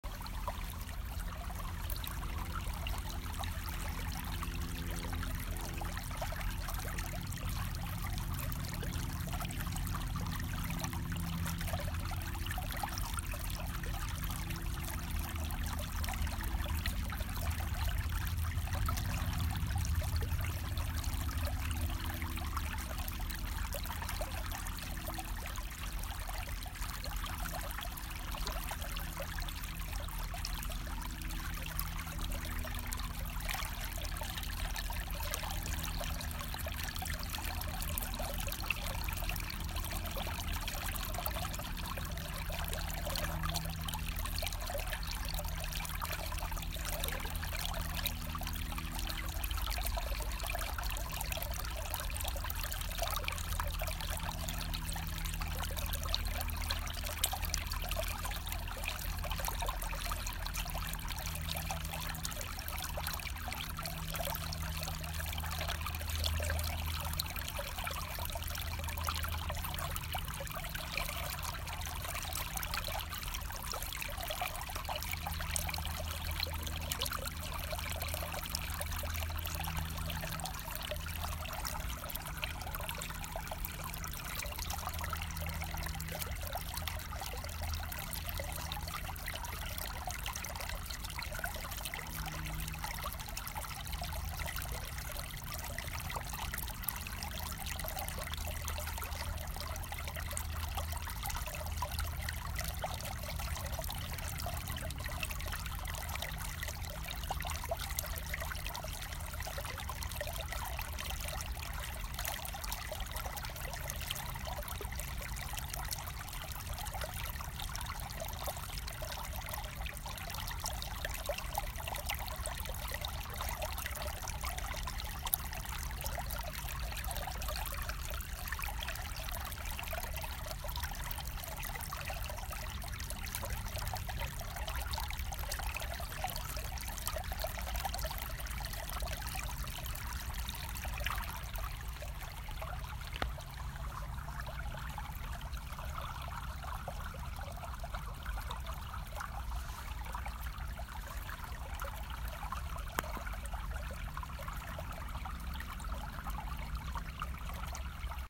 Rivulets May 3 2020 40455 PM
Small river in the Hope Woods area of Kennebunk, ME. Spring stream.
field-recording, river, spring, woods